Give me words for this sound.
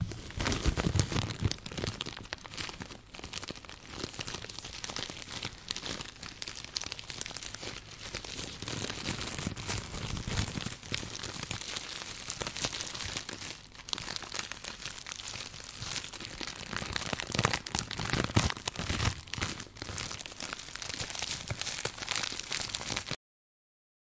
I recorded myself crumbling tinfoil using a Zoom H2n Recorder. I cut and edited the sound in Reaper until I achieved this sound.